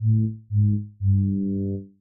Sad Trumpet
A song that you can use when somebody screw up
trumpet, sad, fail